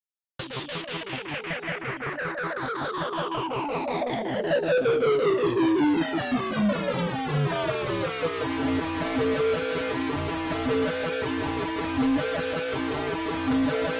more white noise down